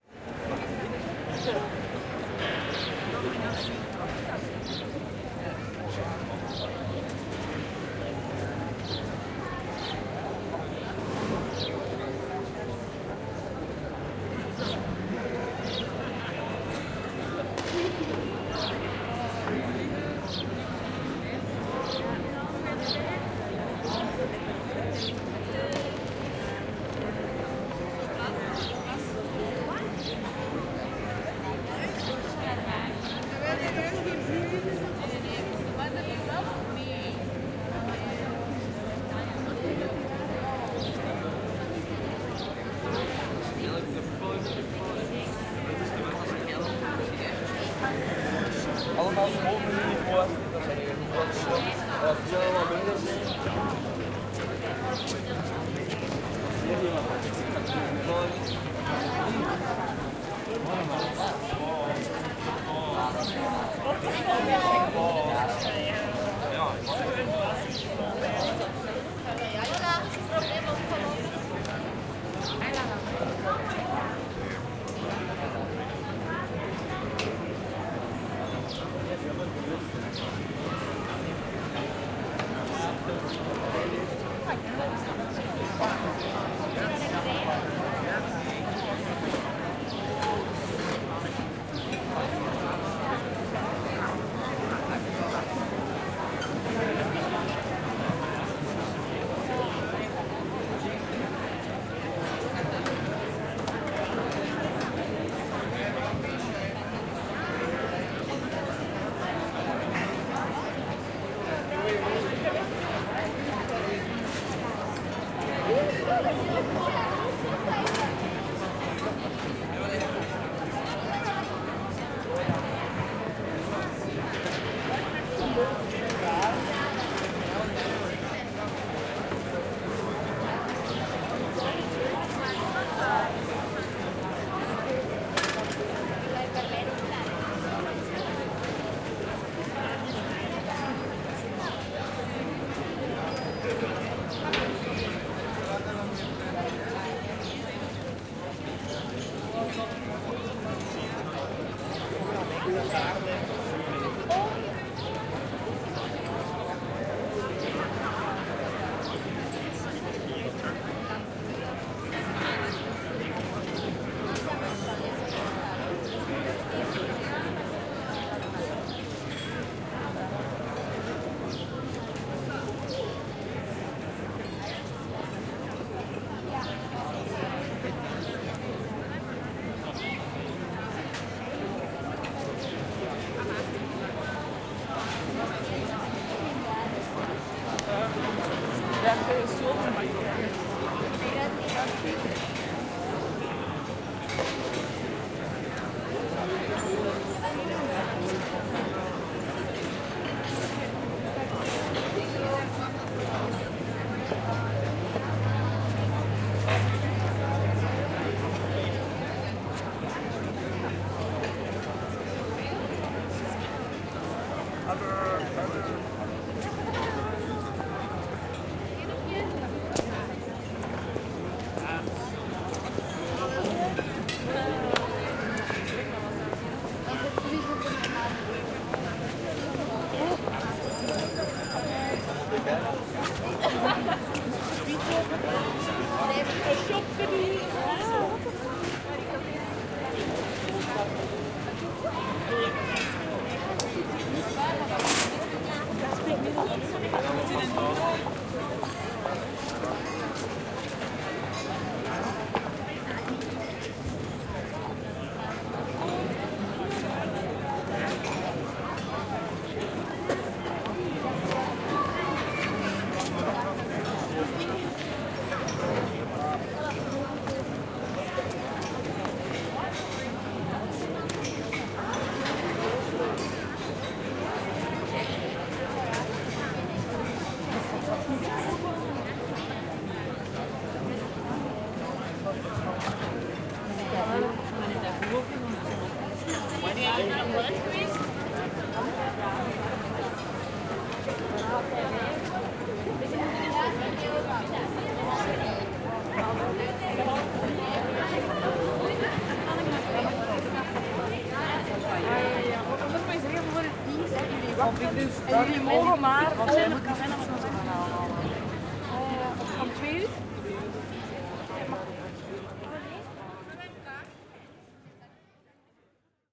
Walk around the Piazza Anfiteatro in Lucca, Italy. 2015-04-09.
Recorded with a Zoom H6, XY mic @ 90º setting.
- joaquin etchegoyen